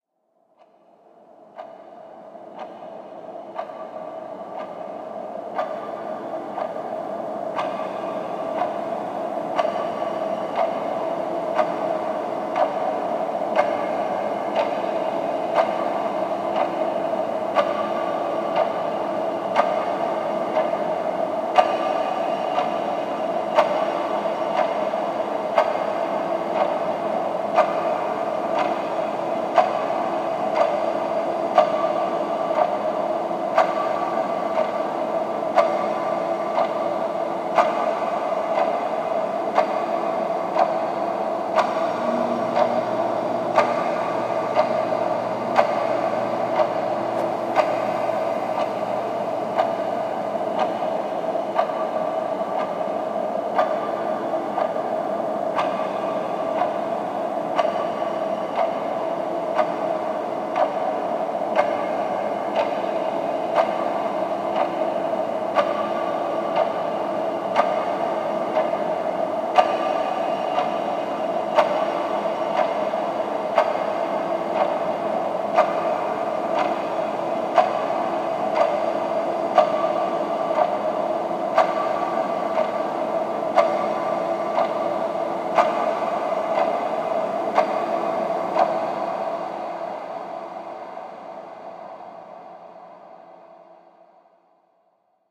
A recording of a wall clock sent through a Fuzz-Wah and Space Designer reverb in Logic Pro X.